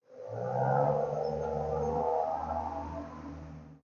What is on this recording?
Truck on the street